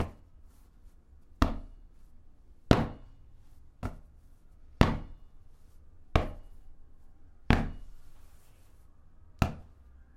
Hitting Ball
ball, hit, metal